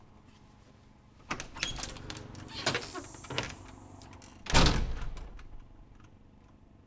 Sound of a screen door opening and closing.
door-closing, field-recording, outdoor, screen-door, summer